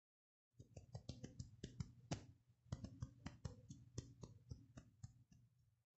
21-Pisadas hierba final
pisadas sobre hierba
hierba, pisadas, steps